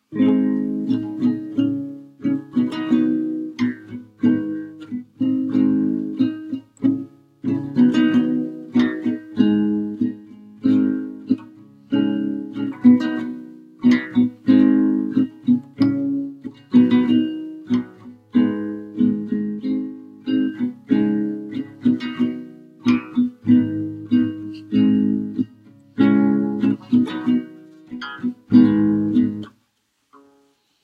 wildwest soundtrack acoustic guitar
Wildest/ western acoustic guitar soundtrack.
I thought it sounded as a sounded for a wild west/western film.
Akkoords played are EM, AM, E and G on an acoustic guitar. Capo is on the 4th.